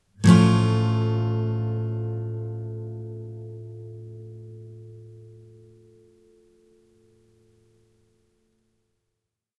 Yamaha acoustic guitar strum with medium metal pick. Barely processed in Cool Edit 96. First batch of A chords. Filename indicates chord.
augmented, a, yamaha, acoustic, guitar, chord